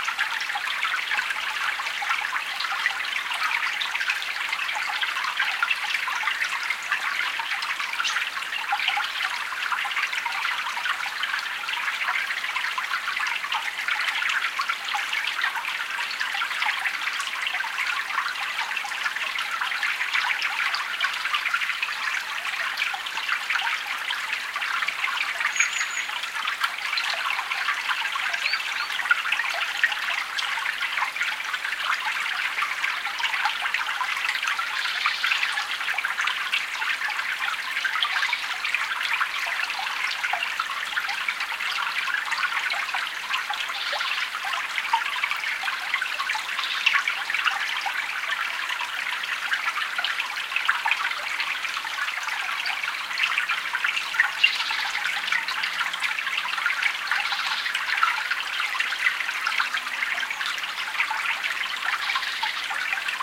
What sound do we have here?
A stereo field-recording of a wooded mountain stream.